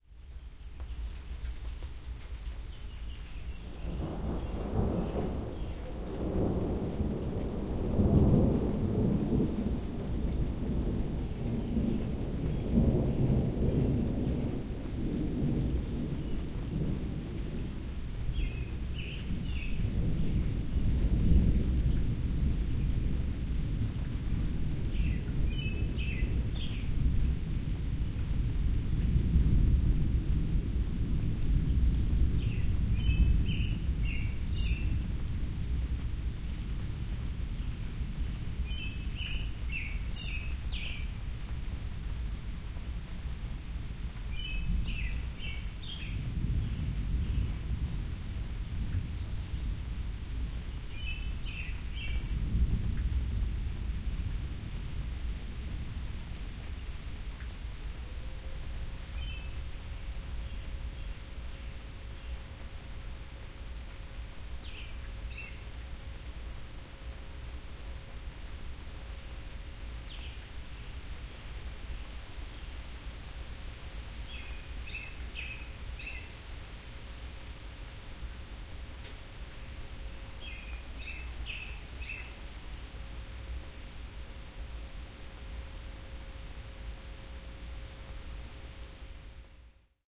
Thunder and Rain 3
Storm, Rumble, Thunder, Rain